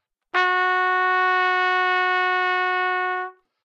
Trumpet - Fsharp4
Part of the Good-sounds dataset of monophonic instrumental sounds.
instrument::trumpet
note::Fsharp
octave::4
midi note::54
good-sounds-id::2835
single-note, good-sounds, Fsharp4, neumann-U87, multisample